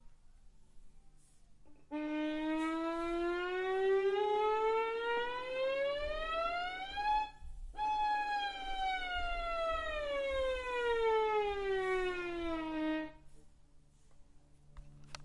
Dslide updown slow
Slide effect was created with a standard wood violin. I used a tascam DR-05 to record. My sounds are completely free use them for whatever you'd like.
arouse, climb, depressing, down, fall, falling, question, sad, slide, stretch, up, violin